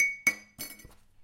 kitchen drum percussion jar tap sound hit